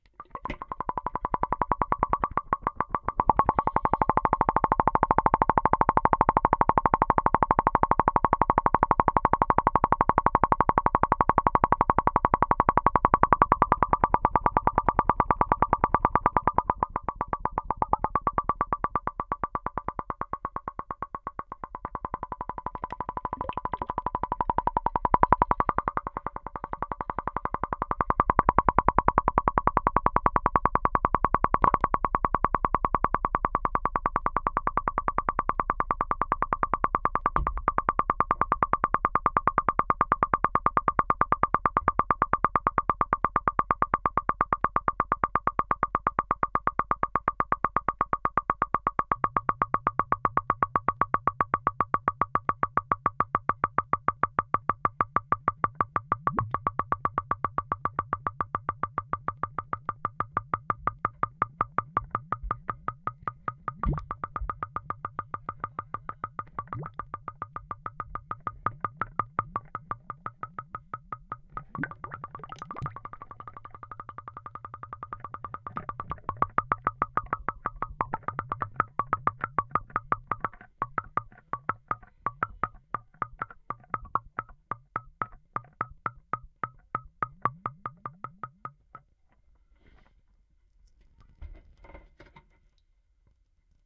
Underwater contact-mic recording of bubbles coming out of my son's toy fishing pole in the bathtub